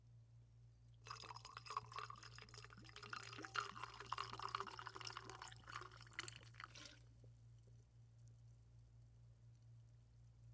Pour Into Glass With Ice FF371
Continuous pour of liquid into glass, liquid and glass hitting sides of container, slower and softer pour